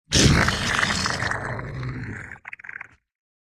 An ogre like beast
beast, beasts, creature, creatures, creepy, growl, growls, horror, monster, noises, processed, scary